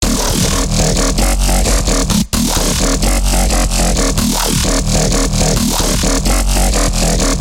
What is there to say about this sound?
becop bass 12
Part of my becope track, small parts, unused parts, edited and unedited parts.
A bassline made in fl studio and serum.
A low grinding bassline alternating with reversed slopes at a 1/3 and 1/4 beat
grind, electronic, bass, synth, Xin, loop, wobble, techno, dubstep, sub, fl-Studio